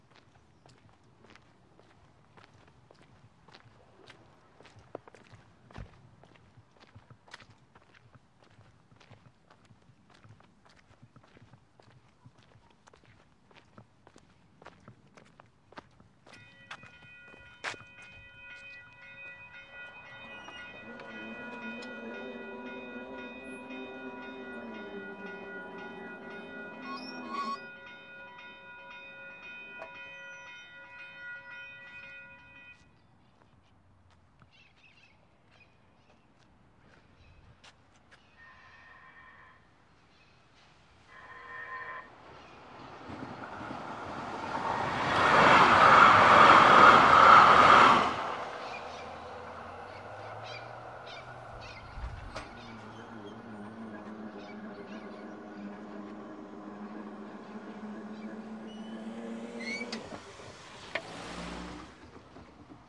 Wet Footsteps Sidewalk : Metro Pass
Wet footsteps metro pass
Footsteps, Intersection, Metro, Wet